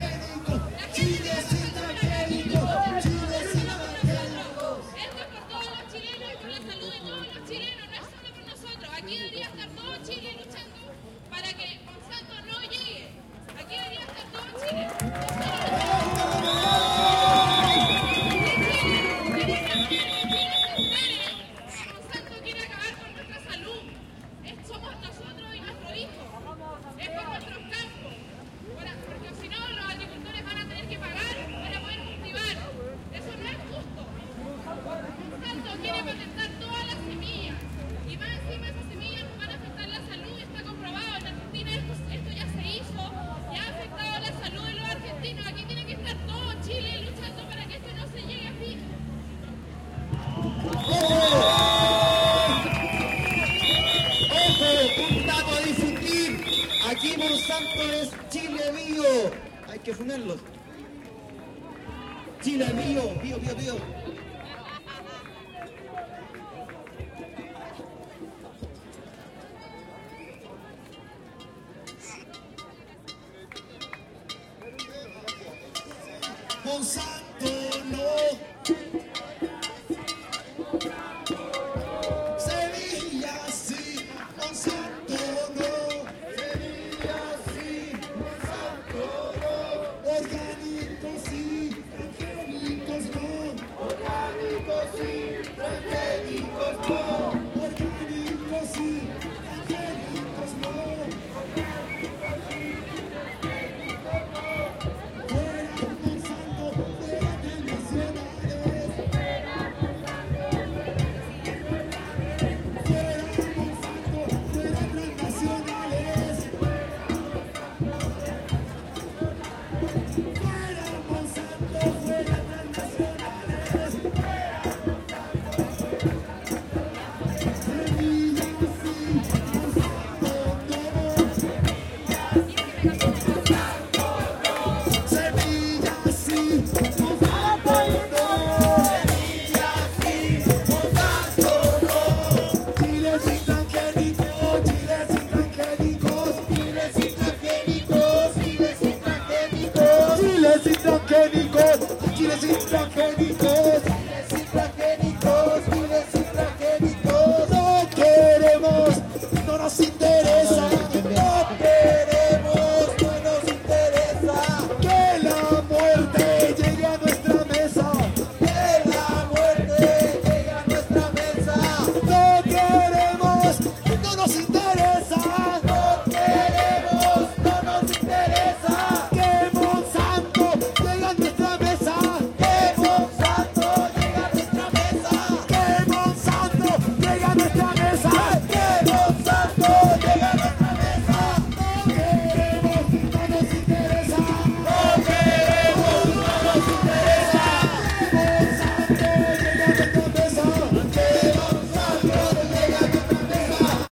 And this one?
protesta monsanto 04 - chile-bio
Varios cánticos en contra del gigante de las semillas transgénicas. Conversaciones, intrumentos de percusión,
aplausos, silbatos.
habla animadora y animador
aqui monsanto es chile-bio
fuera monsanto, fuera transnacionales
semilla si, monsanto no
no queremos que la muerte llegue a nuestra mesa
baquedano, batucada, cantos, chile, crowd, monsanto, park, parque, percusiones, plaza, protest, protesta, santiago, sing